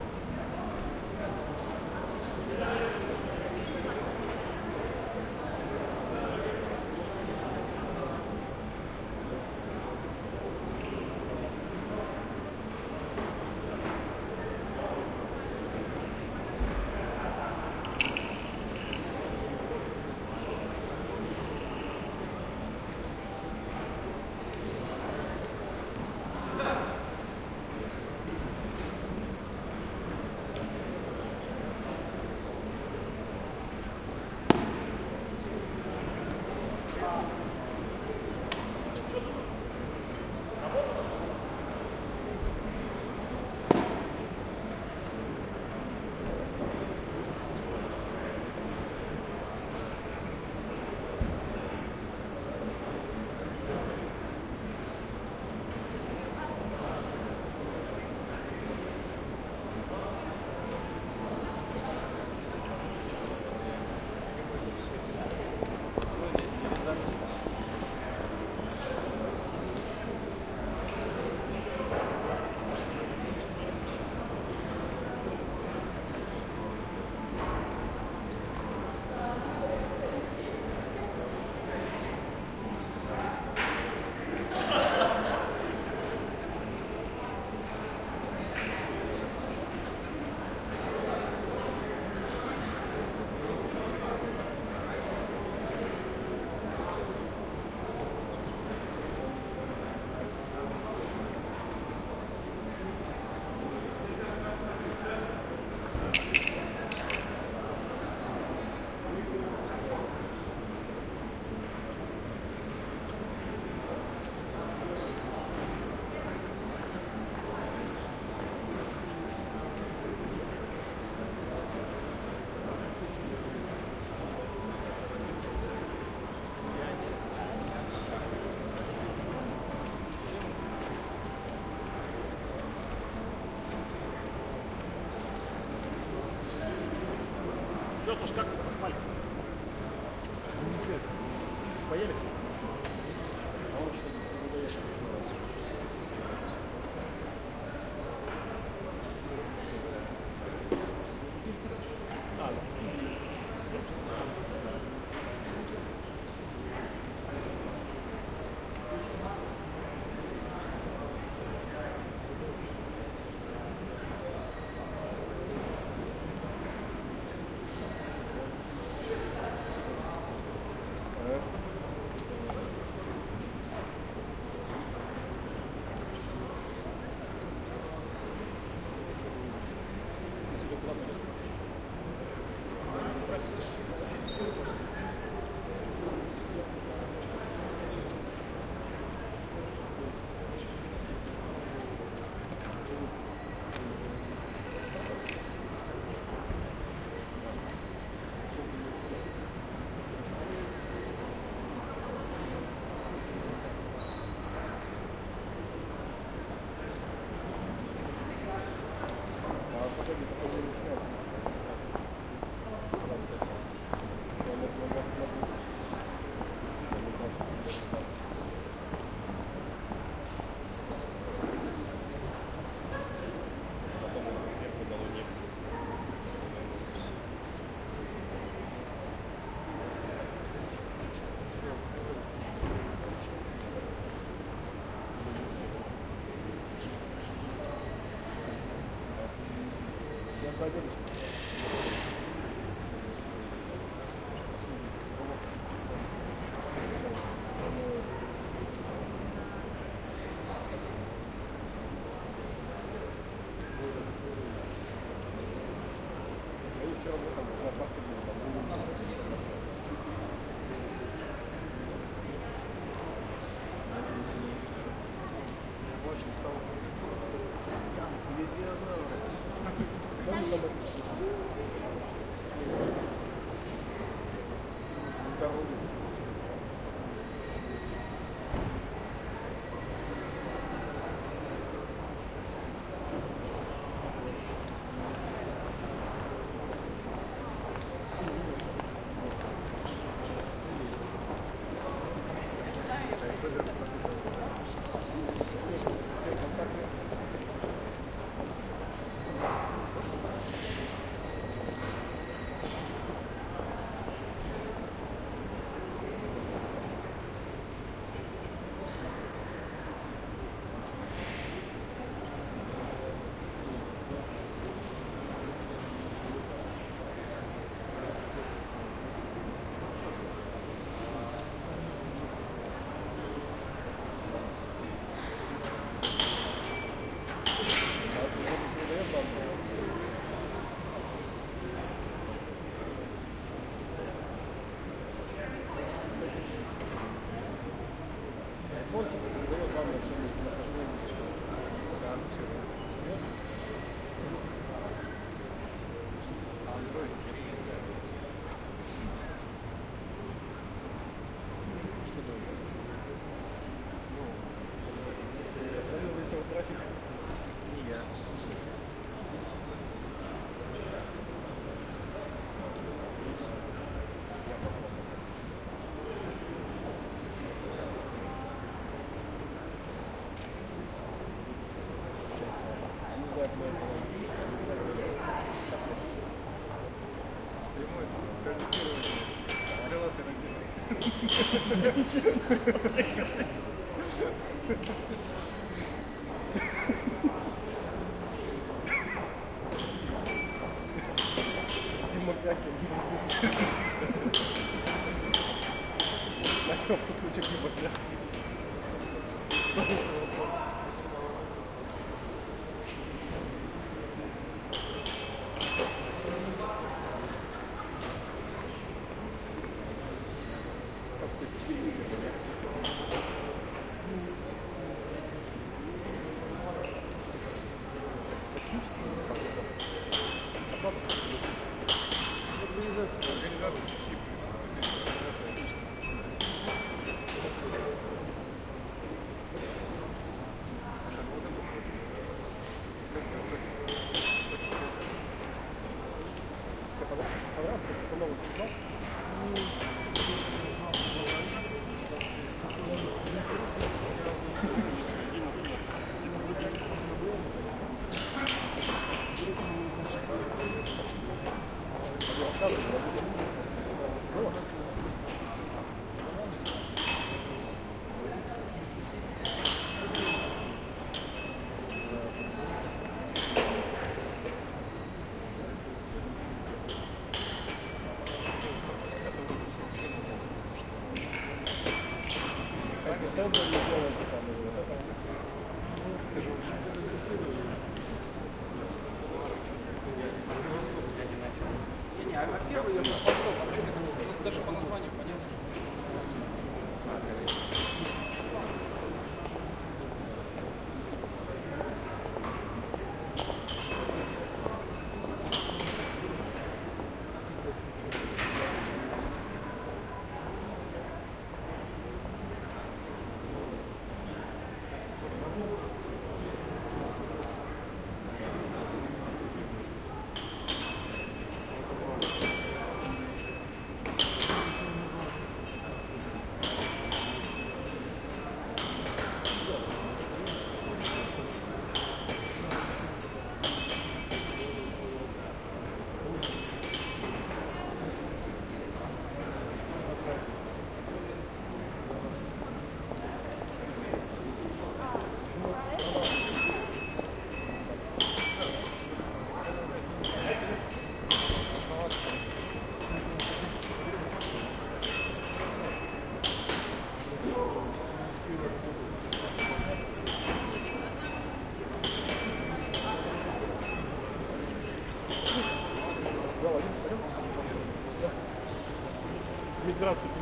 conference hall 3
Atmosphere in the hall of Novosibirsk Expo Center. IT conference Codefest-2013. Laugh. Russian speech. Steps. The clicking of heels.
Recorded 31-03-2013.
XY-stereo.
Tascam DR-40, deadcat
IT, Russian-speech, ambiance, ambience, ambient, atmo, atmosphere, background, background-sound, click, conference, hall, heels, laugh, noise, people, peoples, soundscape, steps